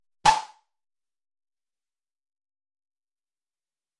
Tonic Short Percussive Sound
This is a short percussive sound sample. It was created using the electronic VST instrument Micro Tonic from Sonic Charge. Ideal for constructing electronic drumloops...
drum, electronic